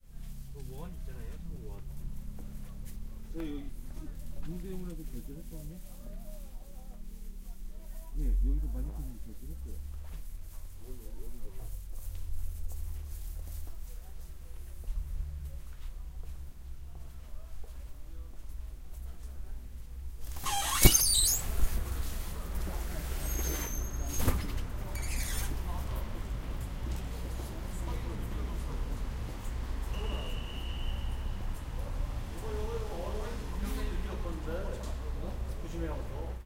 0121 Market door
People talking Korean. Door opening
20120121
korean, voice